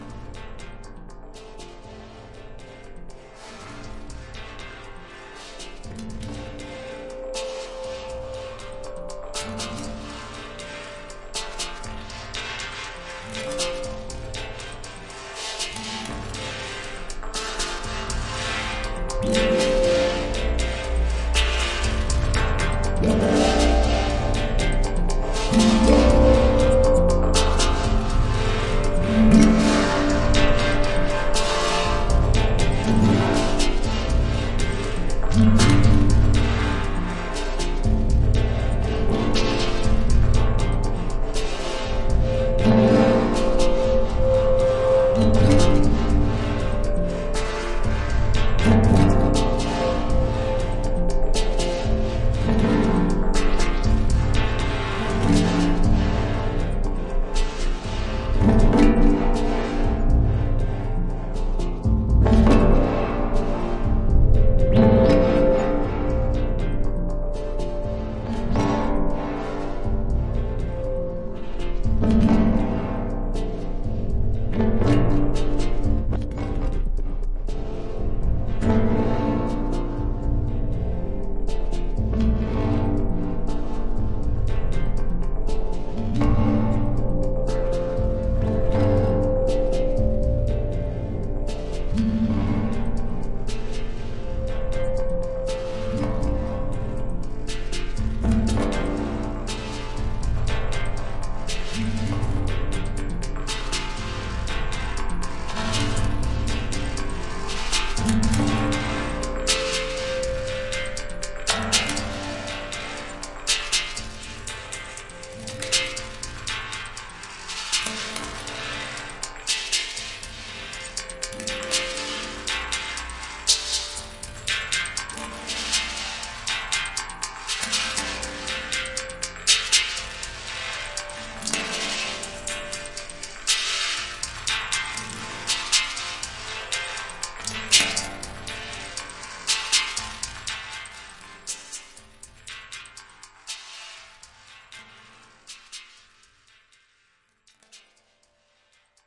A soundfx I created with Reaktor 5 in Ableton. Rhythmic mysterious soundpattern, enjoy!
abstract, atmosphere, delay, effect, experimental, future, fx, pad, sci-fi, sfx, sound-design, soundesign